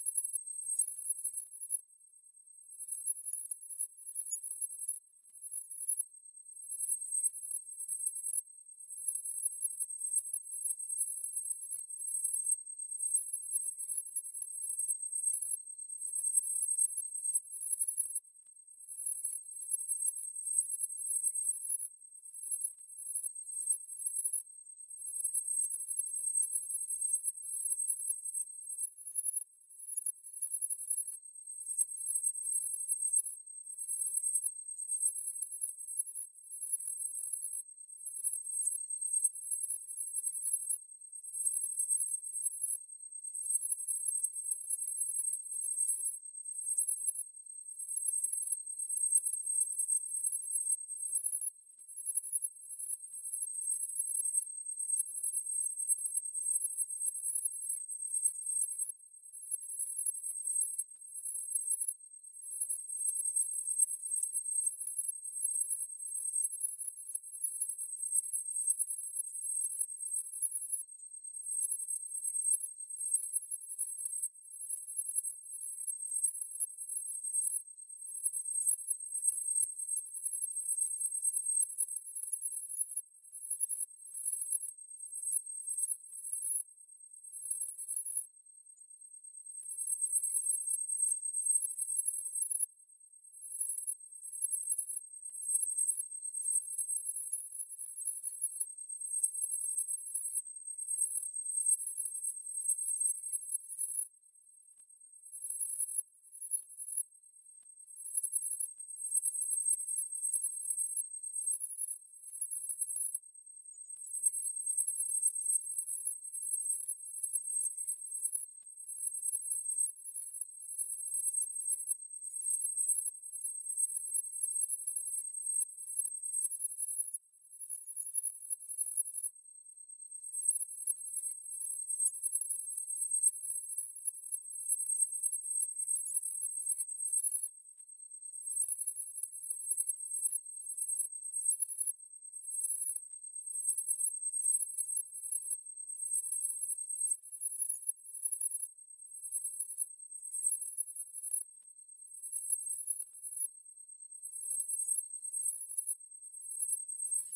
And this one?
sound made with vcv rack and audacity